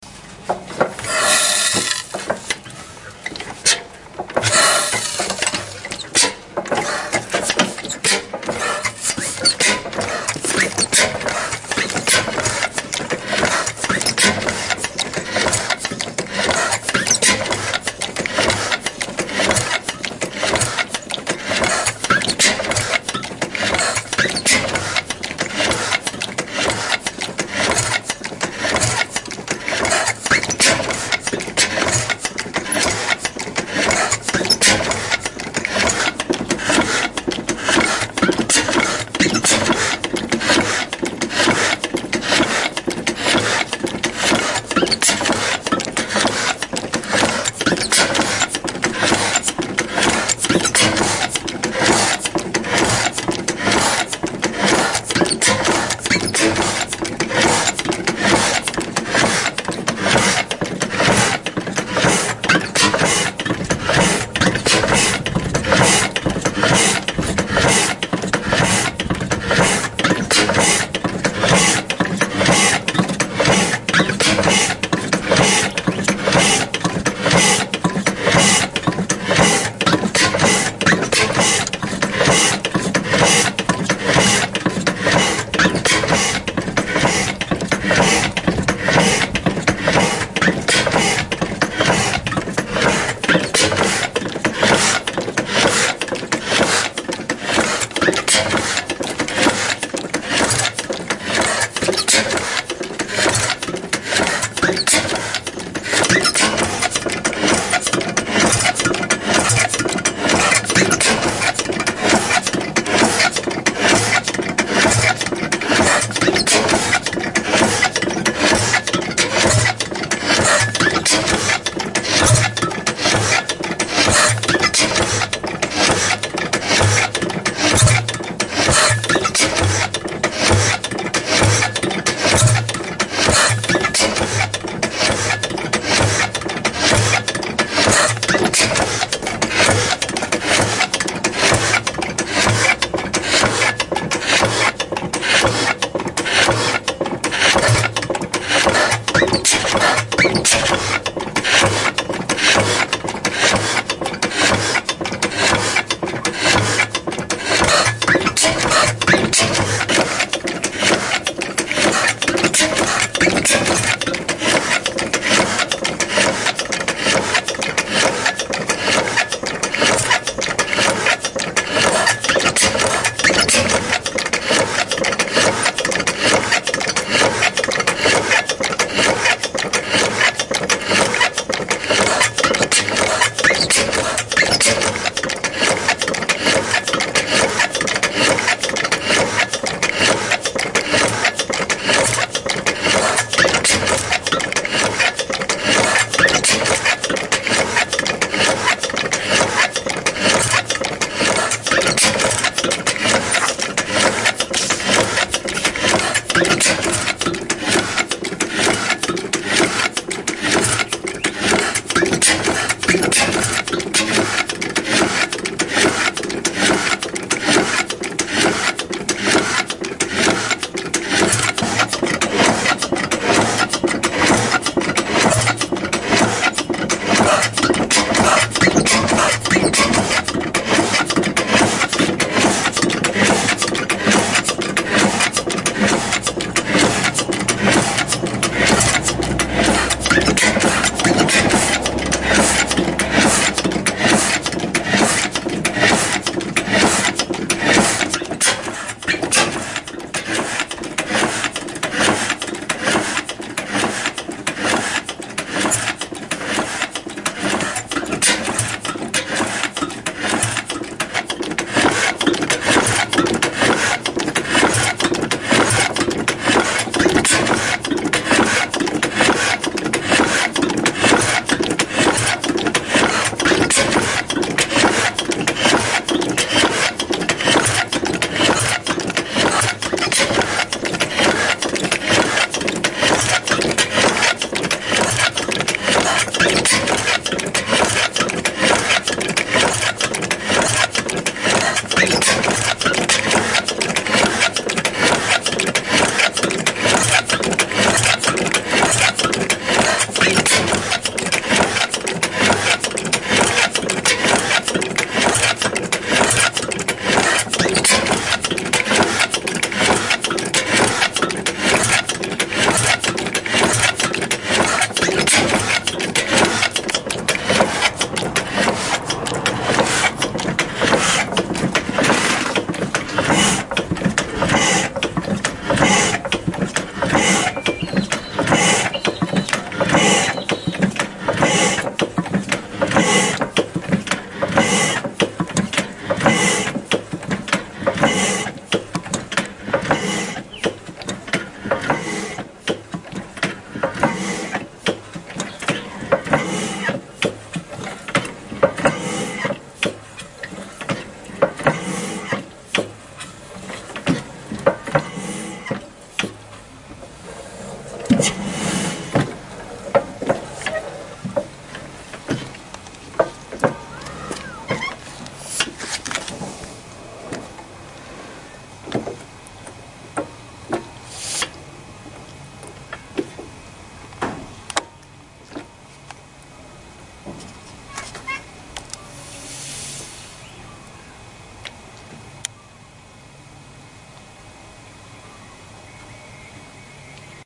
Blackstone Oil Engine (1909) Percussion
This is the sound of a Blackstone oil engine from 1909.
engine, motor